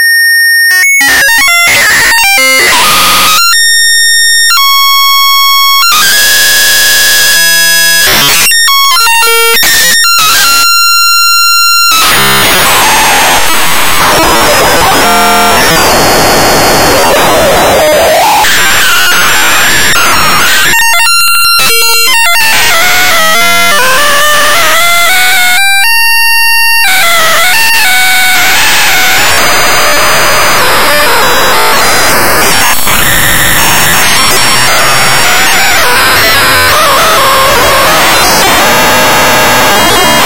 glitchy modem-type noises #7, changing periodically a bit like sample and hold, random walk through a parameter space, quite noisy. (similar to #8, except with even slower and varied S&H rate). these sounds were the results of an experimental program i wrote to see what could be (really) efficiently synthesized using only a few instructions on an 8 bit device. the parameters were randomly modulated. i later used them for a piece called "no noise is good noise". the source code was posted to the music-dsp mailing list but i can't find it right now.